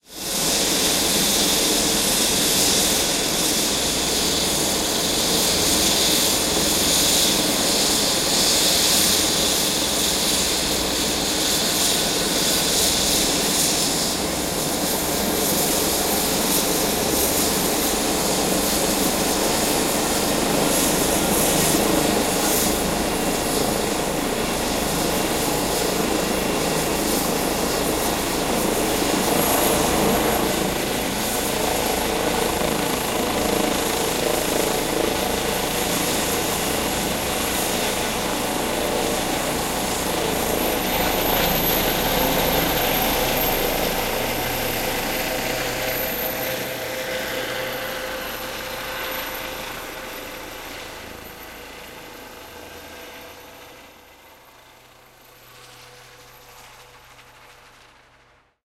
EC-135-Idle-To-Takeoff
A recording of a starting Eurocopter EC-135. Distance ca. 20m. Due to noisy surroundings I could only use the sound starting from idle to takeoff.
Recorded with the stereo mic of my H6-Zoom, handheld.
heli, helicopter, flying, eurocopter, takeoff